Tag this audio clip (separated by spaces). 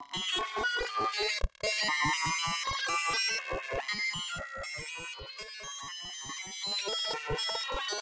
broken digital glitch electric freaky sound-design strange noise futuristic abstract mechanical machine